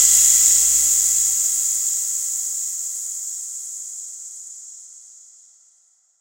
Recorded this rainstick I have, added a lot of processing in Adobe Audition.
percussion, rain, rainstick, reverb